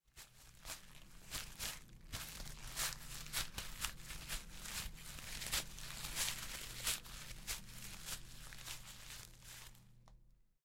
Pasos Vaca
a cow wlaking in the grass